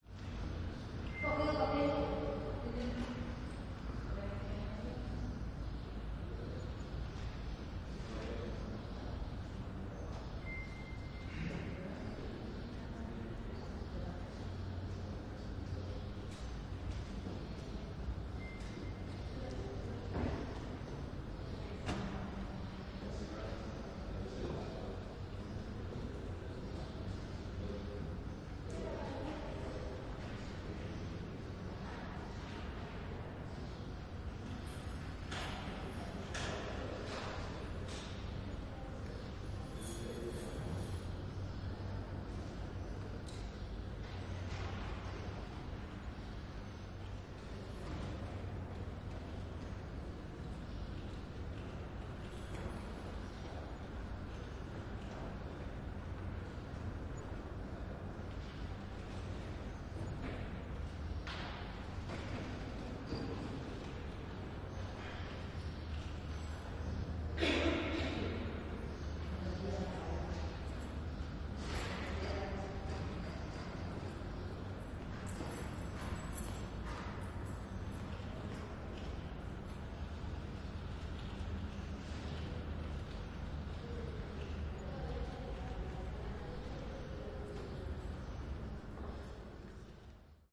Big hall ambience - some voices, coughing, and keys opening a door